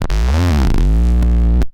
Mute Synth LowPitch 006
Low-pitch sound from the Mute-Synth with interesting waveforms. Pitch sweeps up and then down.